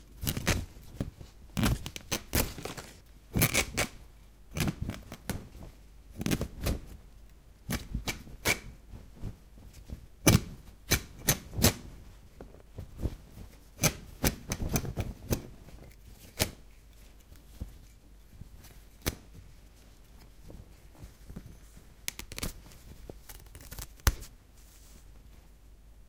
Knife Cutting T-Shirt Cloth
Knifing a shirt.
Result of this recording session:
Recorded with Zoom H2. Edited with Audacity.
cutting, t-shirt